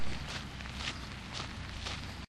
Walking through the middle of the National Mall between the Art Gallery and the Air and Space Museum recorded with DS-40 and edited in Wavosaur.